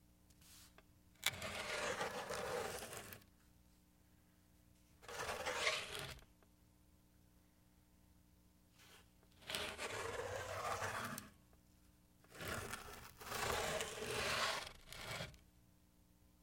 object pushed on table
Heavy metal object pushed across a hard surface.
Foley sound effect.
AKG condenser microphone M-Audio Delta AP